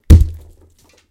Some gruesome squelches, heavy impacts and random bits of foley that have been lying around.
blood, foley, gore, splat, vegtables, violent